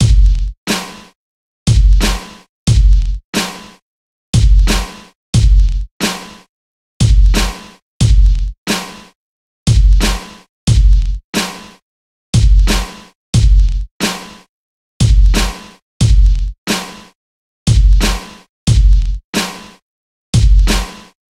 Fat Drum beat
drum, hip, big, Drumloop, beat, hop